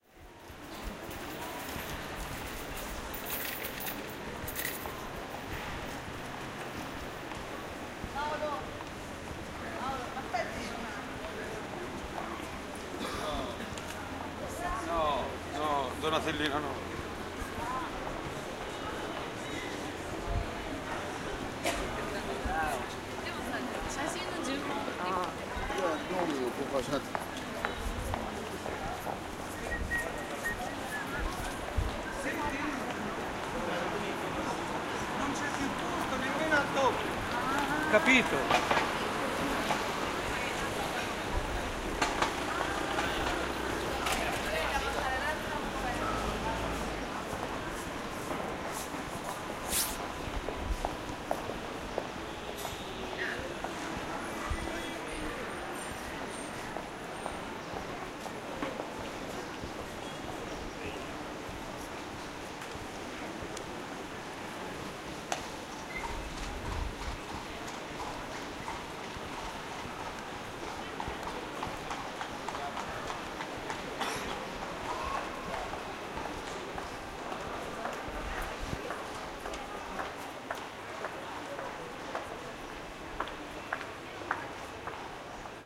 0403201224 via calzaioli
20 mar 2004 12:24 - Walking in via Calzaioli
(a large pedestrian street in the centre of Florence, Italy). Female
voice, male voice, different languages, horse-drawn cab, heels footsteps